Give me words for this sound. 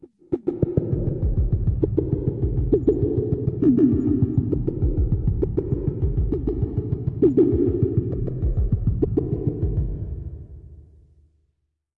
THE REAL VIRUS 04 - RESONANT FREQUENCY LOOP 100 BPM 4 4 - C0
High resonant frequencies in an arpeggiated way at 100 BPM, 4 measures long at 4/4. Very rhythmic and groovy! All done on my Virus TI. Sequencing done within Cubase 5, audio editing within Wavelab 6.
100bpm, groove, loop, multisample, rhytmic, sequence